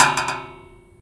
steel bench hit.8
One school steel bench one drumstick and h4n zoom.